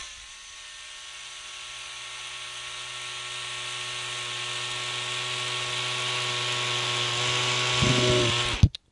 Drill getting closer